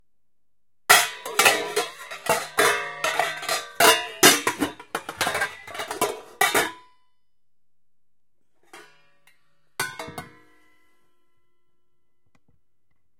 clattering metal objects